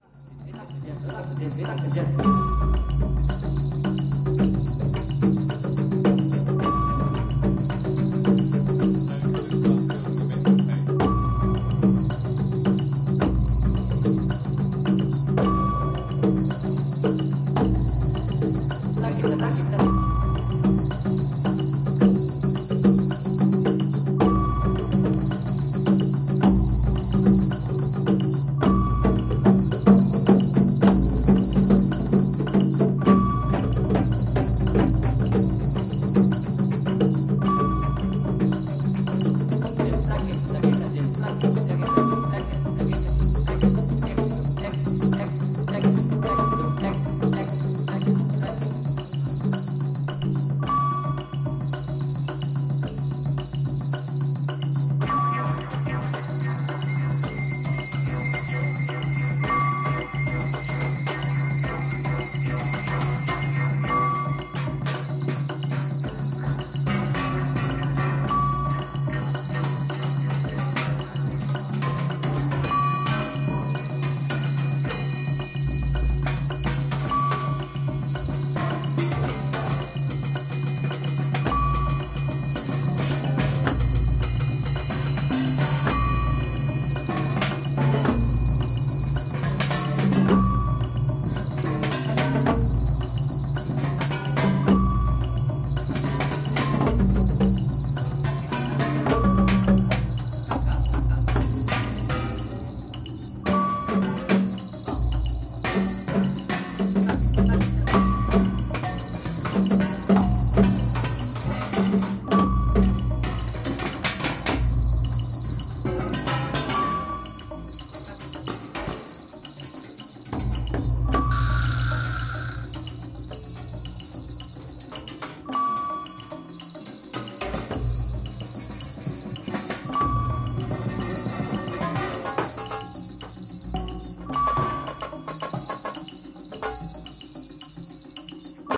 Mridangam, bells, konakkol in Electroacoustic music
This is a recording made in a rehearsal session for an electroacoustic orchestra. Konakkol (vocal percussion form from Carnatic music), Mridangam and Bells are heard against the backdrop of an ambient sound scape.
bells, drum, electro-acoustic, indian, konakkol, mridangam, percussion, takadimi